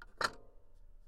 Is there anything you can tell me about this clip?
Complete Toy Piano samples.
Key press or release sound.